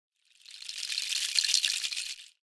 Rattle crescendo
Crescendo sample for a wood and seeds rattle for virtual instruments